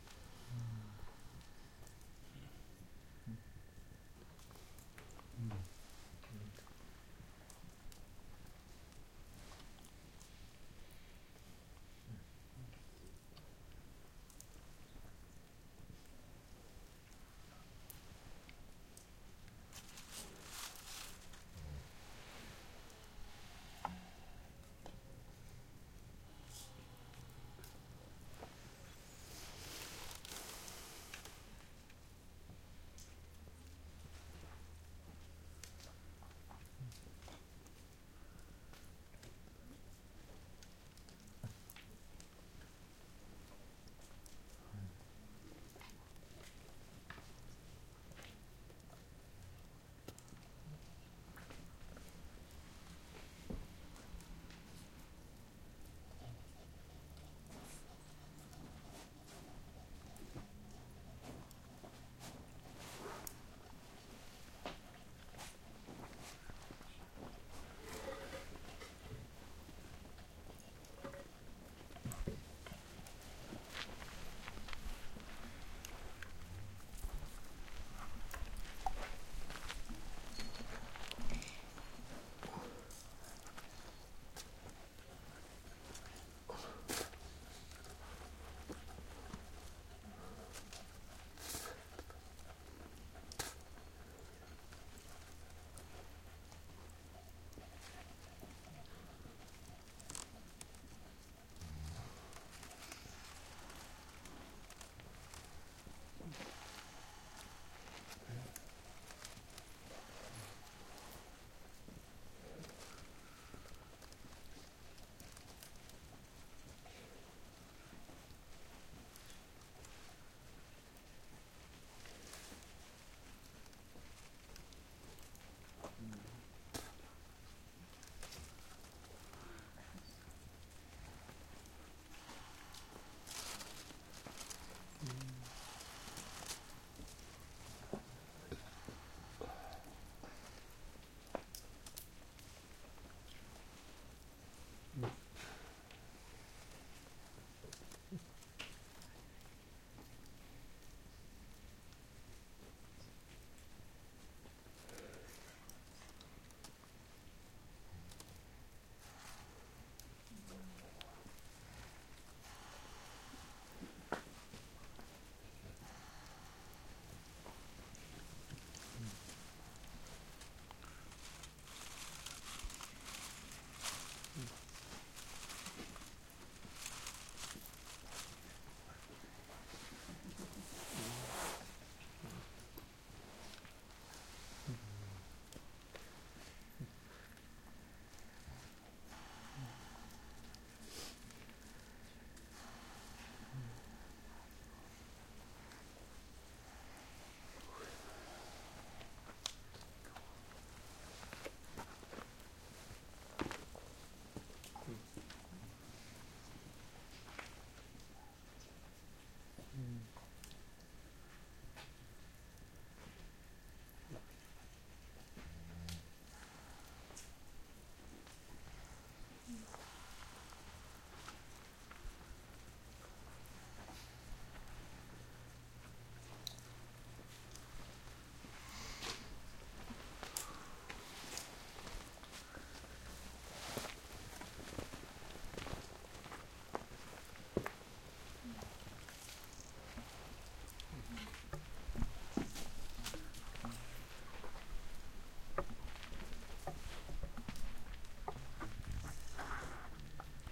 campsite quiet hut crickets quiet movements around sleeping people